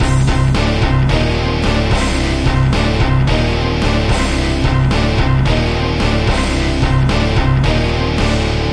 Short metal loop
Heavy Orchestral Metal loop. Loop was created by me with nothing but sequenced instruments within Logic Pro X.
dark, epic, heavy, metal